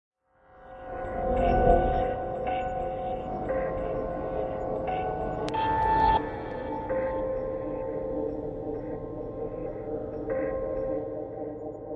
ab harbourt atmos
sounds like a foggy haunted harbour
soundscape, drone, evolving, pad, freaky, ambient, sound, experimental, horror, atmospheres